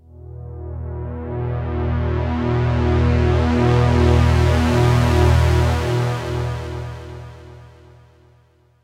BflatEflat-PulseLongADSR
Pulse made on Roland Juno-60 Synthesizer